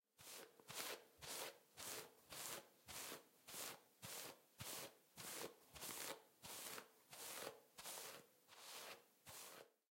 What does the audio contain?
Brushing raw hair with hair-brush.